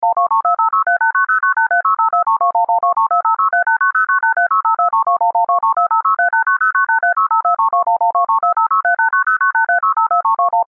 DTMF dial tones